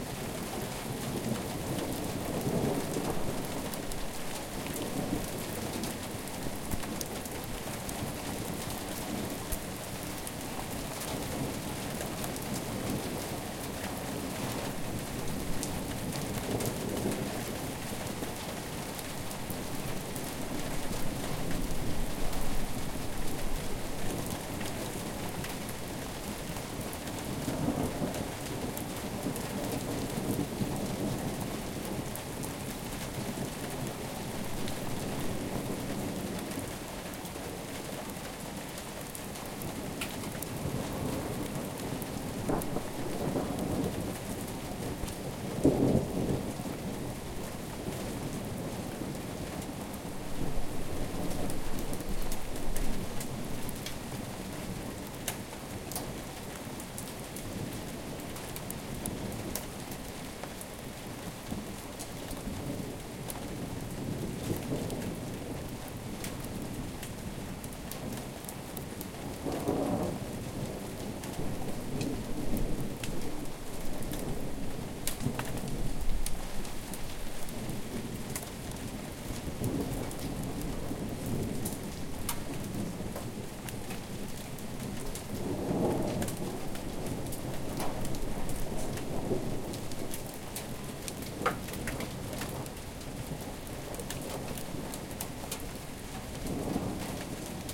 Thunder Rain Backyard
Thunder and rain bouncing off some things in my back yard.